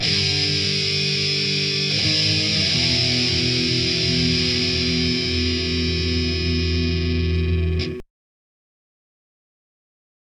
2 guitar hold loop1
190, bpm, groove, guitar, hardcore, heavy, loops, metal, rock, rythem, rythum, thrash